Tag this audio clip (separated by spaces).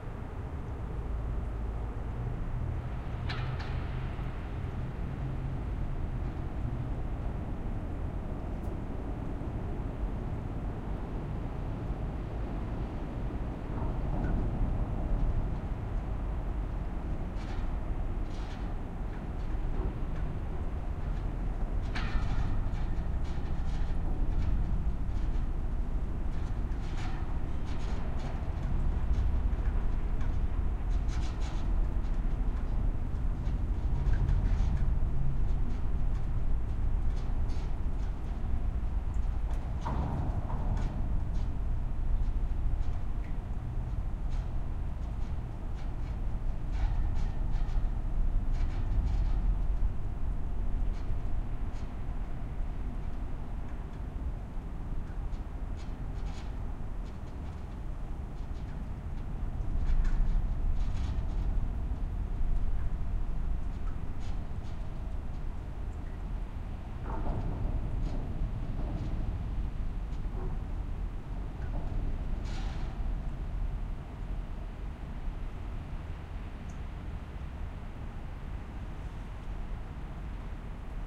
cars drone hum keynote organ pipes resonance Traffic wind